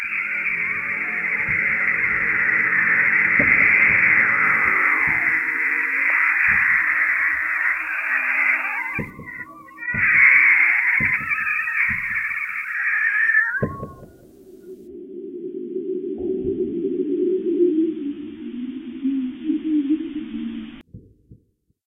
Unscreamed, vol. 4
I'm going to place some parts of damped-or-not scream.